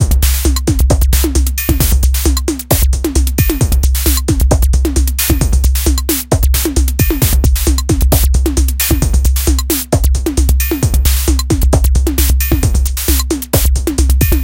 133 bpm ATTACK LOOP 04 electrified analog kit variation 08 mastered 16 bit
This is loop 8 in a series of 16 variations. The style is pure electro.
The pitch of the melodic sounds is C. Created with the Waldorf Attack VSTi within Cubase SX. I used the Analog kit 2 preset to create this 133 bpm loop. It lasts 8 measures in 4/4. Mastered using Elemental and TC plugins within Wavelab.
133bpm, drumloop, electro, loop